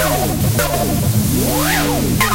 rave tunes 102 bpm-10
rave tunes 102 bpm